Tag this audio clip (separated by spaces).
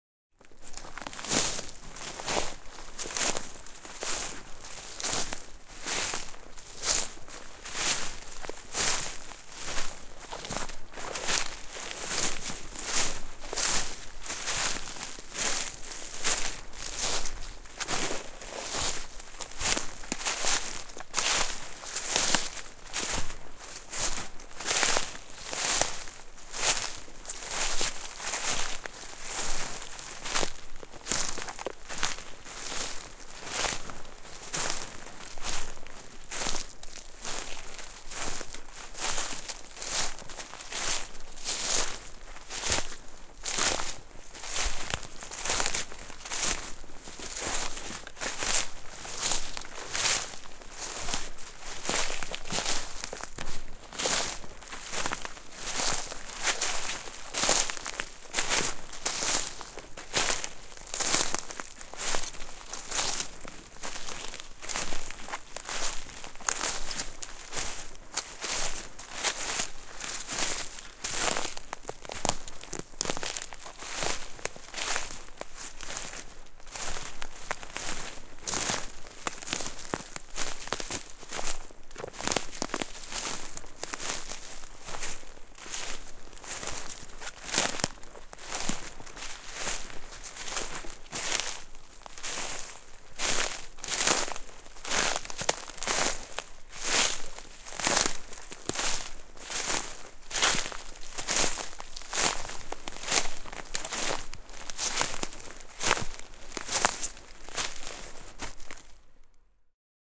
Soundfx Finnish-Broadcasting-Company Varvikko Yleisradio Forest Patikoida Trekking Tehosteet Yle Underbrush Hike Askeleet Walk Retkeily Patikointi Trek Rustle